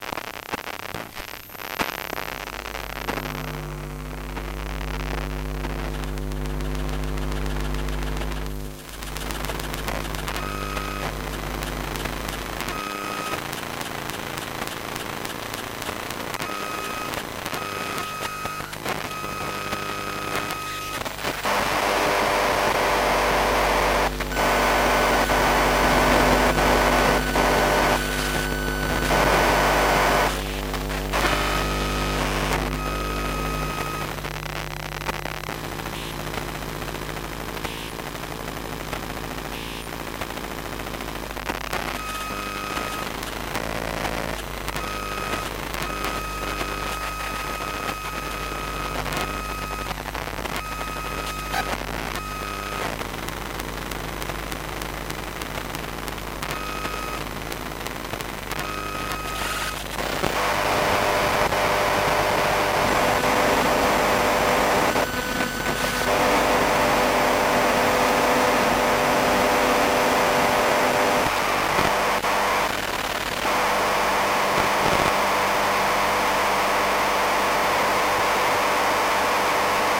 Recordings made with my Zoom H2 and a Maplin Telephone Coil Pick-Up around 2008-2009. Some recorded at home and some at Stansted Airport.
telephone, electro, magnetic, field-recording, coil